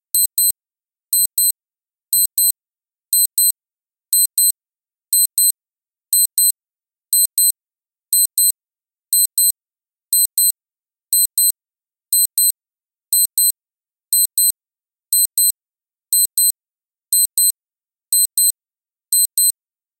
Sound of the alarm